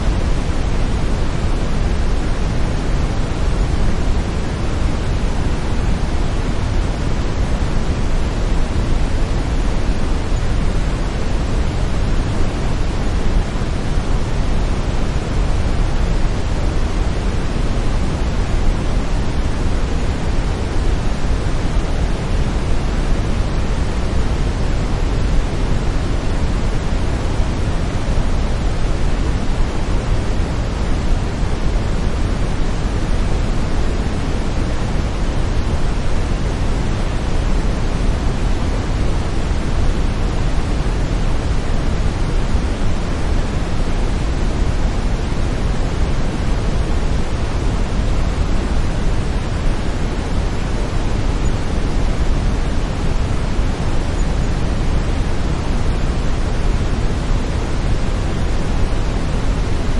Waterfall – Silence, Ambiance, Air, Tone, Buzz, Noise
This is a series of sounds created using brown or Brownian noise to generate 'silence' that can be put into the background of videos (or other media). The names are just descriptive to differentiate them and don’t include any added sounds. If the sound of one is close, then try others in the pack.